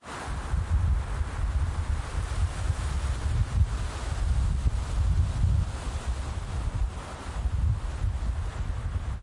!rm tree wind
recorded the wind blowing through the leaves with my zoom h4n hand held recorder. then processed in Ableton live 9